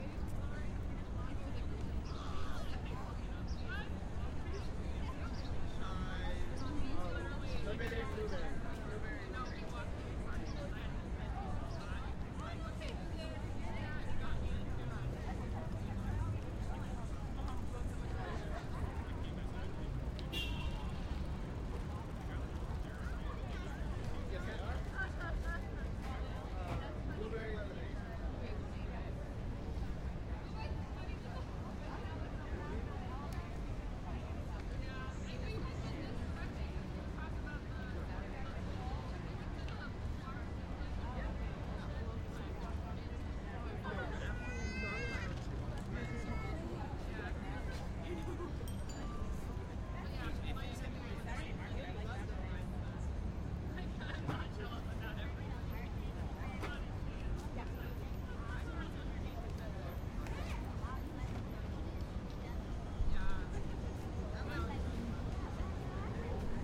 Citey Park Downtown Portland Oregon 03
This is a recording of a city park in downtown Portland, Oregon. There are many cement/glass surfaces as well as many people/crowds talking, as well as traffic in the background.
Ambiance, Park, City